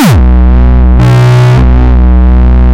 gabba long 002
distortion, gabba, kick